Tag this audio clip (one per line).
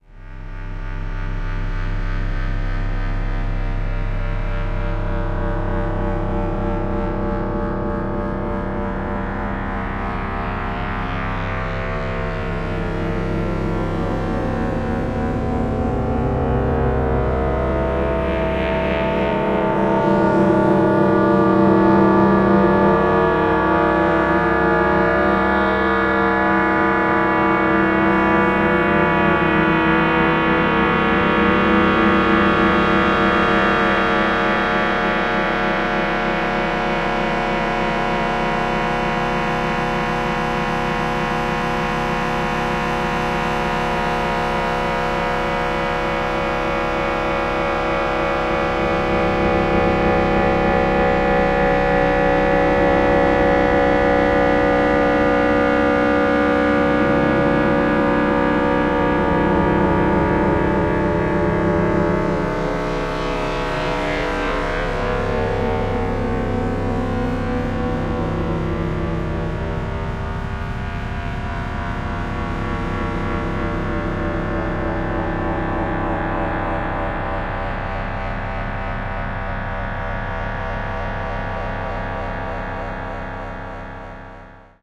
sound-design
space
horror
distorted
stretch
pulsating
fx
drone
ambient
digital
future
sound-effect
effect
deep
pulsing